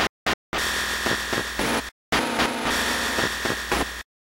113 beat sperm innit
113 beats per minute... uhh. another remix of samples by kat.
loop, drumloop, glitch, know, dont, noise, bpm, thing, 113, circuit-bent